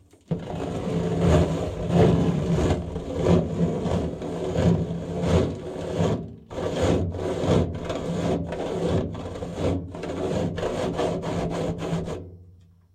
scraping plastic cup on metal02
Contact mic on a large metal storage box. Rubbing a plastic cup upside down on the surface.